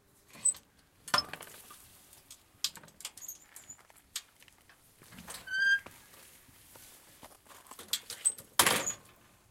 Opening and closing a gate 1
Opening and closing a gate.